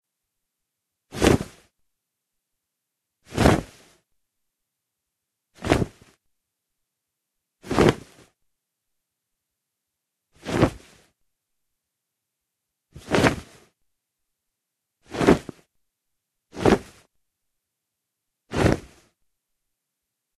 Jacket Shake
Nylon, cotton, jacket, cloth, dressing, clothing, handle, clothes, movement